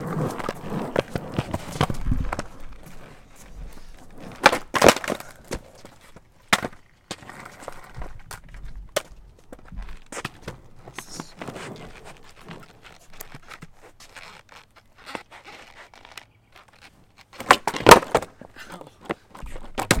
Old skateboard being ridden on concrete driveway with faint birds and voices in the background. Go get Creating!